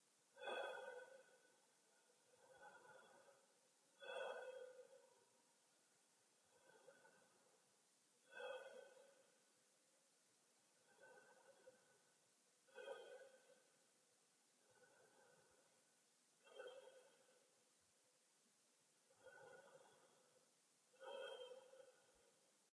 Future Spacesuit Breathing
Took me some time to find the right sound, as in a spacesuit.
Great for movie, animation etc.
Thank you for the effort.